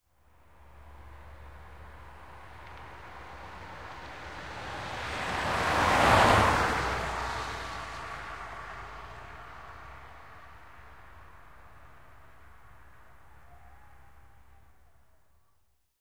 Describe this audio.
Vehicle Drive-By
A vehicle driving by, in wide stereo.
Recorded with a Tascam DR-40 in the A-B mic position.
car, drive, drive-by, driving, fly-by, left-to-right, pan, stereo, vehicle